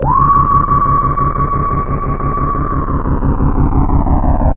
RESO SREAM 2 D
Korg Polsix with a bad chip
bass, d, droning, modulating, reso